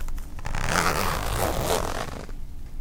This is a coat zipper, unzipping all the way.
coat, environmental-sounds-research, jacket, unzipping, zipper